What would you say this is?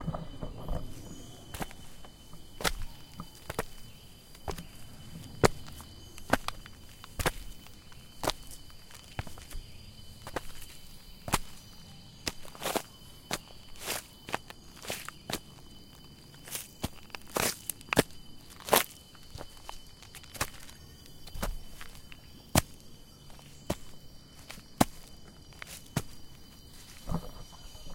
Footsteps in Nature
Feet, steps, foot, shoe, walking, running, footsteps, walk, leaves, step, horse, nature